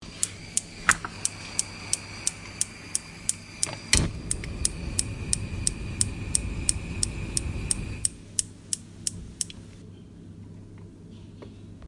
The sound of the gas and fire in the cooker